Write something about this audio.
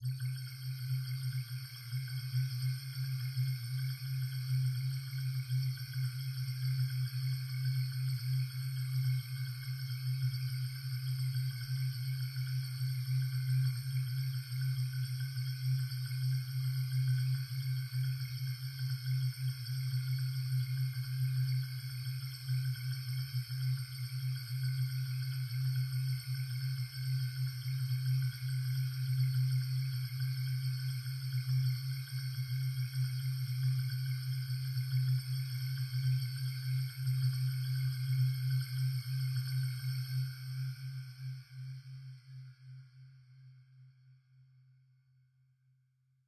Result of a Tone2 Firebird session with several Reverbs.
dark, reverb